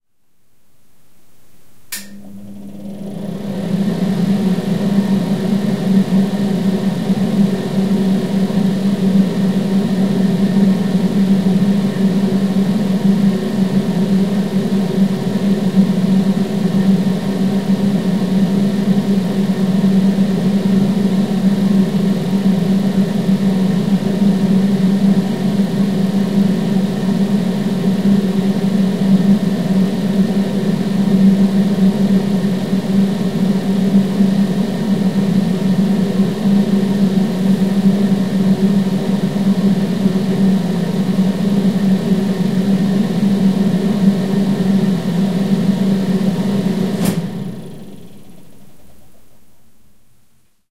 Bathroom fan. Start, operation, stop.
Recorded with Zoom H4N/ integrated microphones at 120° angle. Distance app. 50 cm